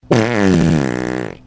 Yet another maggot gagging fart from my bowels.